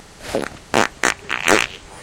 fart poot gas flatulence
weird, computer, laser, explosion, frog, aliens, snore, nascar, ship, poot, flatulence, gas, race, fart, flatulation, noise, car